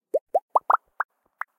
Mouth-made sound, edited (pitch).